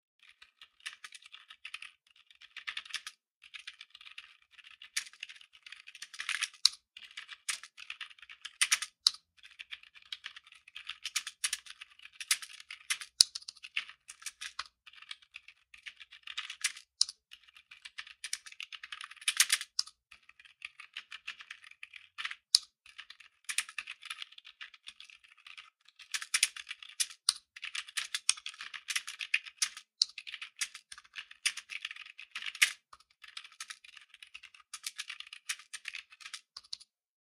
015 - Regular Keyboard.L
Sound of a keyboard while typing.
computer, key, keyboard, keys, keystroke, office, pc, type, typewriter, typing